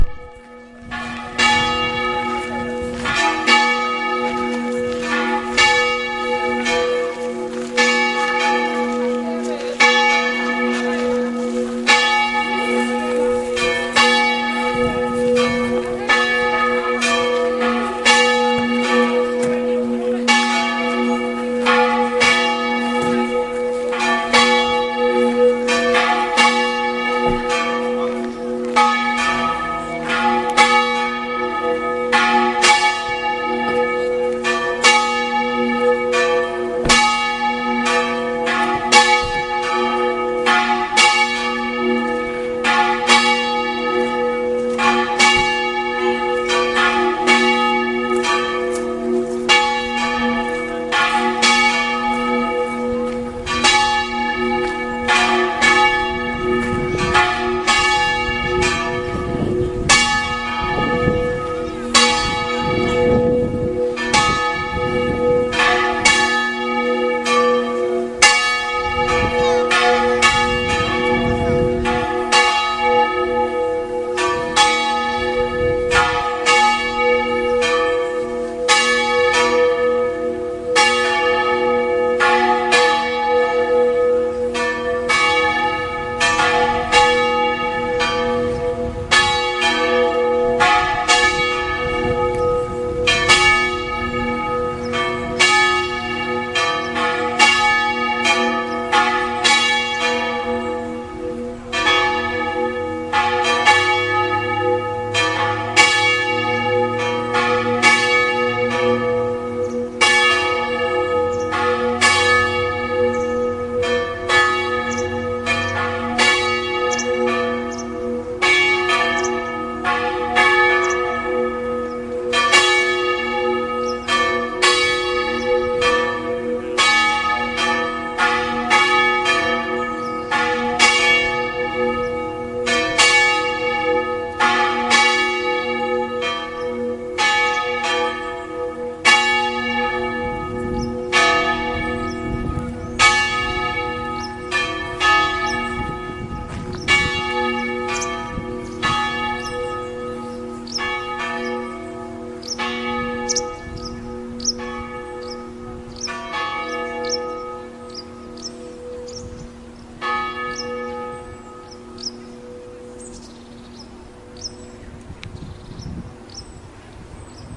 Sound of Kerimäki Church bells 2013. Recorded with poket-camera Nikon Coolpix S8200
bell, dong, ringing, cathedral, bells, church, sound, gong, church-bell